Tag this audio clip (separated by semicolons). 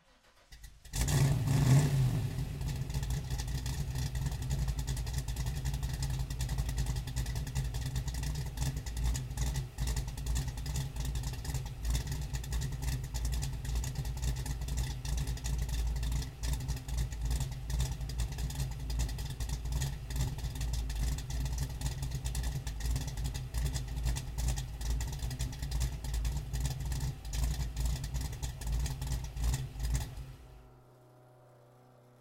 start; motor; engine; v8